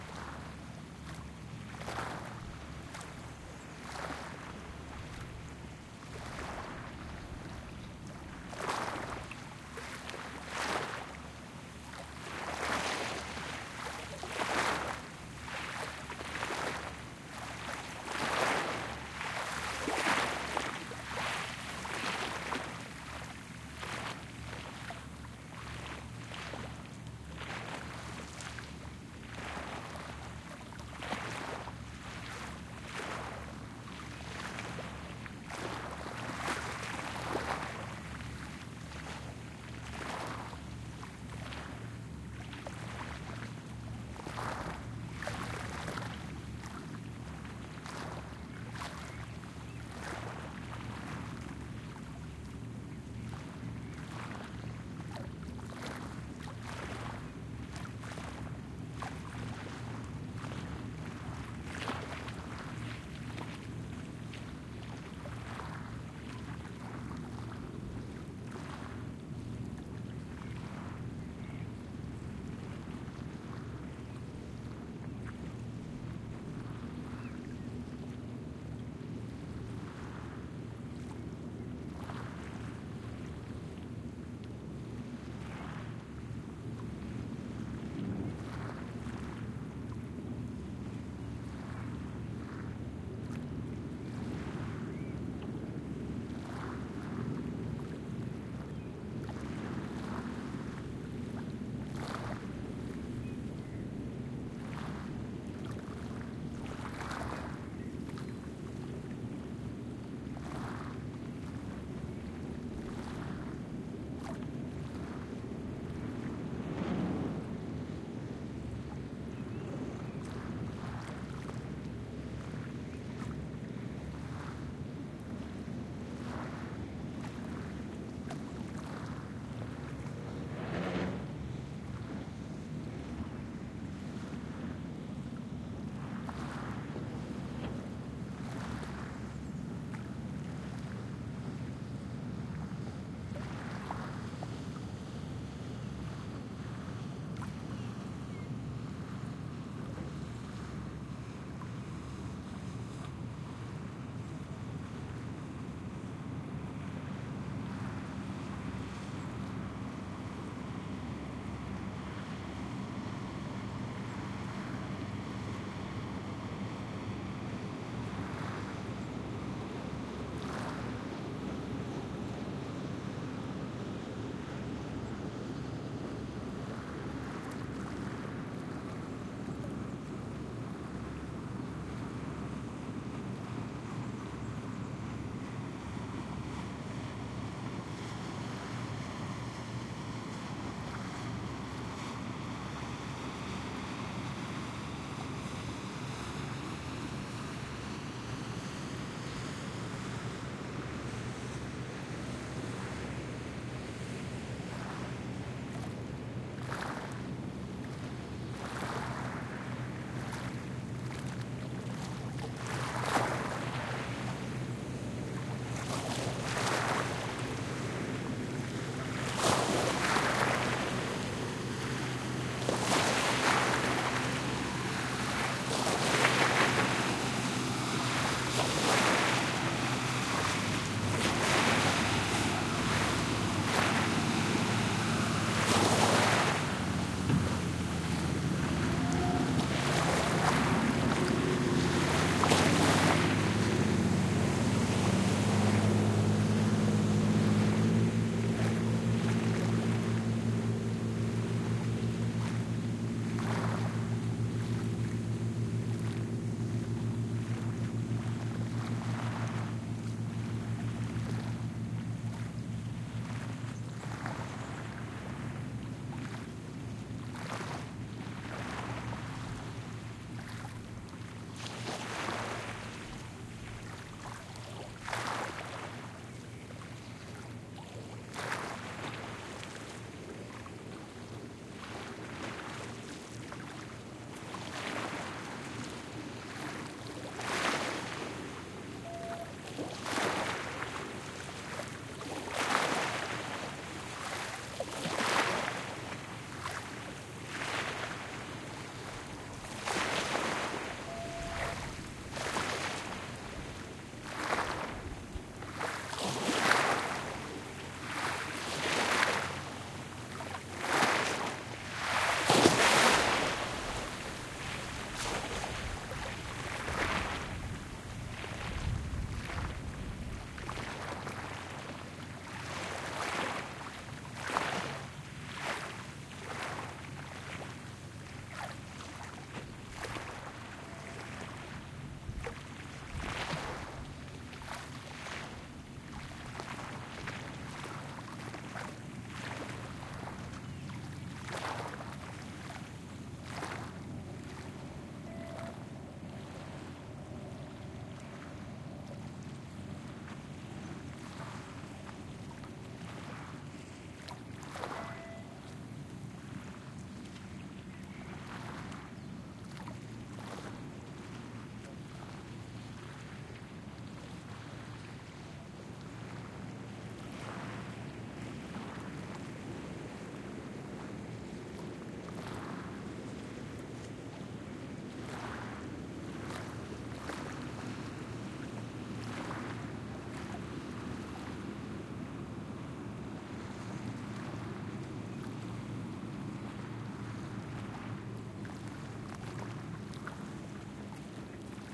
Waves and Boats in the Laguna
Waves splashing and crashing on the shore.
Boats passing by.
In the distance, the gentle rush of the laguna is heard.
Recorded on Shure MV88